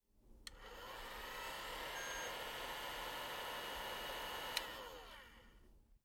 Paint burner blowing away